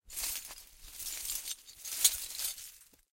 Broken glass contained in a felt cloth. Shuffled as cloth was gathered. Close miked with Rode NT-5s in X-Y configuration. Trimmed, DC removed, and normalized to -6 dB.
glass, broken, shuffle